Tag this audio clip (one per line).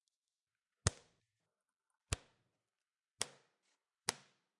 elastic
whip